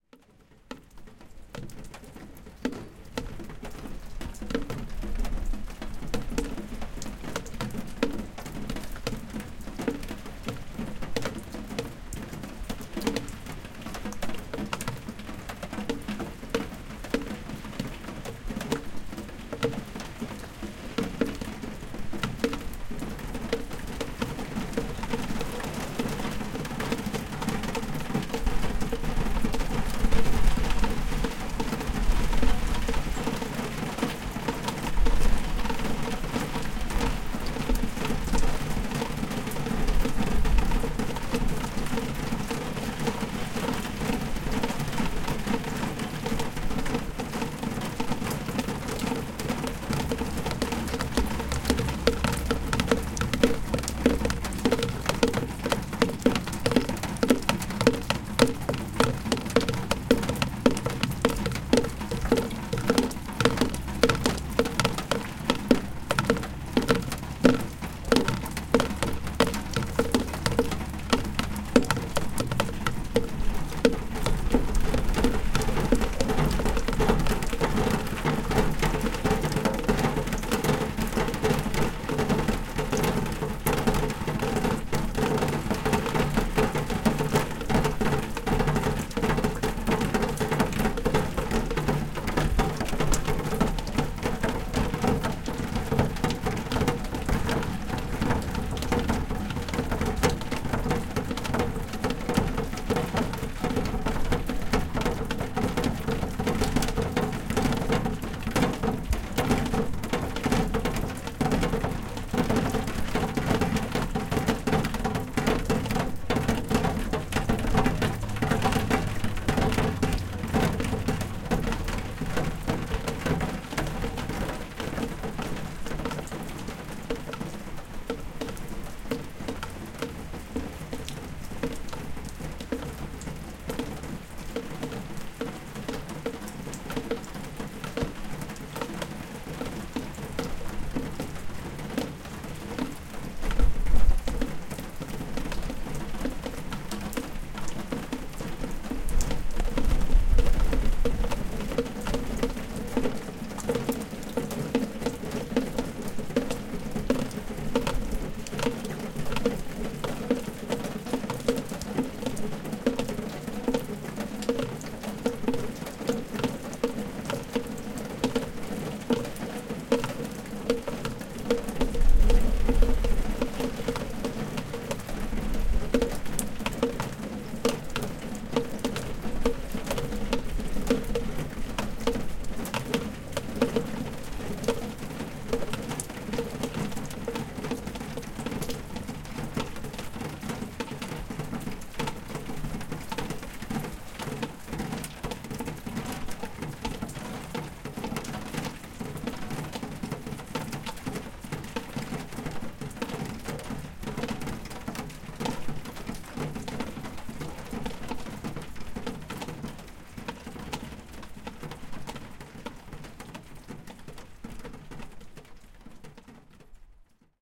Kalgoorlie Rain for Coral
The rhythm of rain recorded on the back porch of my sisters house in Kalgoorlie. Why? Because she liked it.
Recorded with the Zoom H4. I tried several different mic positions to capture different sound textures and rhythms.
drops, rain